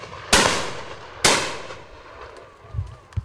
Two close range shots2
Sounds of shots taken from side-by-side shooter during a Pheasant shoot.
gun, gunshot, pheasants, over-and-under, shooting, shotgun, shot, season, shoot, bang, discharge, side-by-side, fire, firing